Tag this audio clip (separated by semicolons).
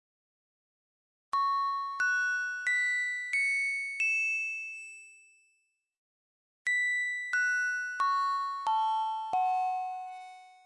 pitch-down; down; pitch; SFX